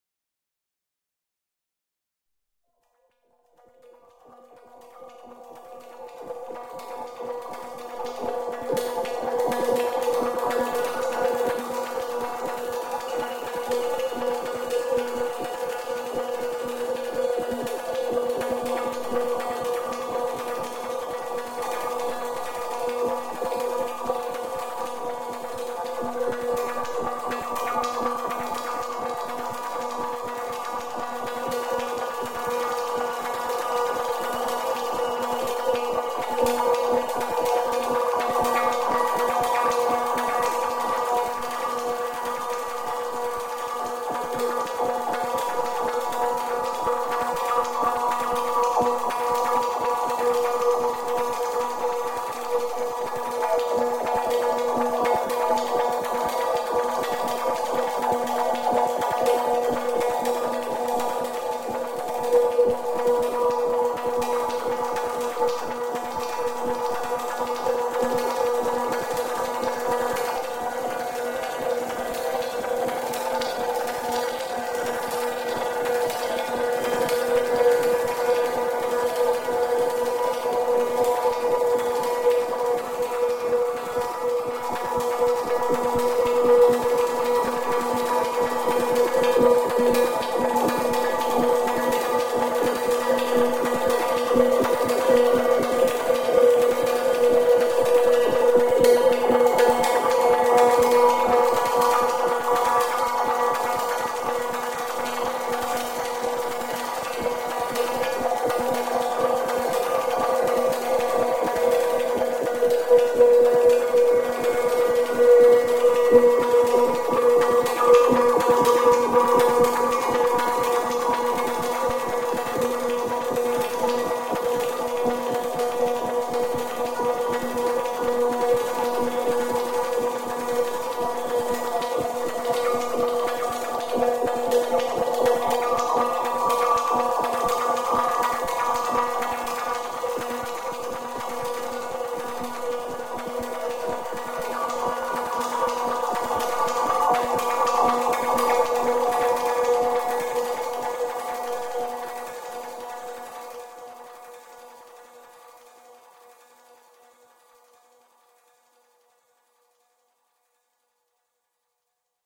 Lunar Lounge v1
sci-fi, sequence